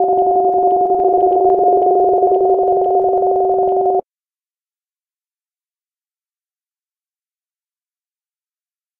possible alarm within a spaceship